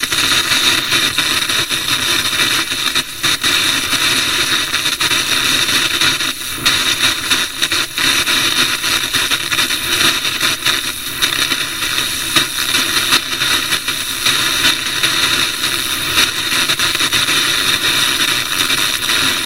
Static from a radio station wireless remote.
static, noise, radio-static